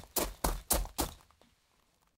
running on gravel
Foley recording of a man starting to run on gravel
footsteps, gravel, man, running